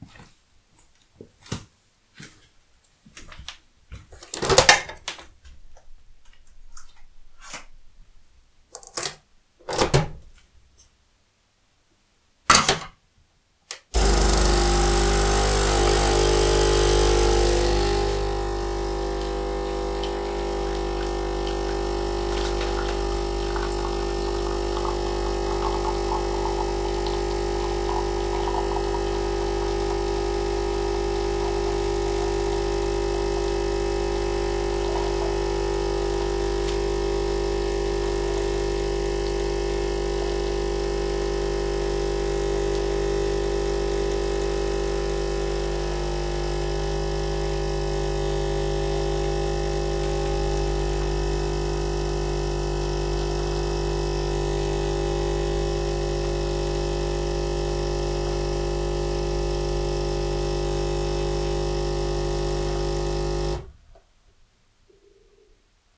Nespresso coffee machine operation, including inserting the capsule at the beginning.
coffe, electric, machine, motor, Nespresso, noise